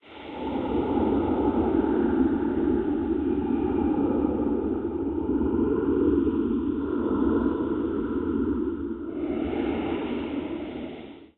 horror Ghost low-pitched sound
I created this sound with my voice in Adobe Audition CC 2017 and then I used the Crowd Chamber plugin to do the ghost effect. Then, I used the PitchWheel plugin to make a more serious sound.
ghost, haunted, phantom, terror